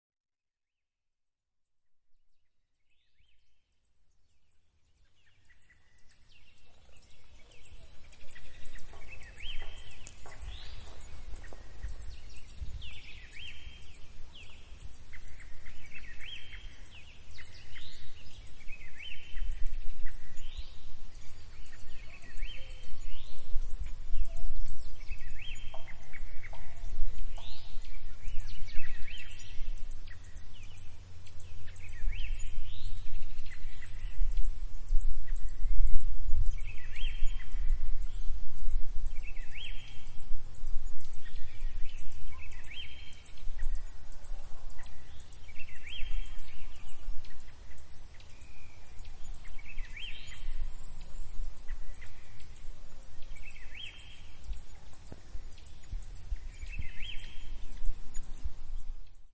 birds forest lake atitlan guatemala arka
recording near arka meditation center guatemala